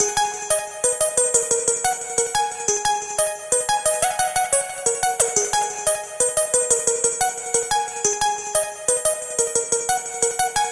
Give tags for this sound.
Synth DrumAndBass dvizion Heavy Melodic Beat Loop Drums Bass 179BPM Pad Vocals Drum Fast DnB DrumNBass Lead Vocal Dream Rythem

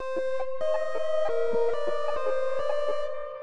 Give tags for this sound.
2 pad riff

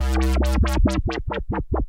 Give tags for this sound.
thick fx low drop fat chorus analog bleep filter

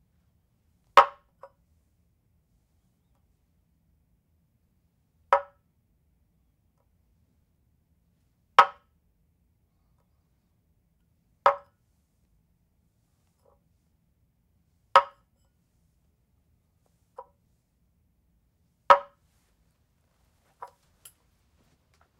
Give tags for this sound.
clinking counter glass put-down

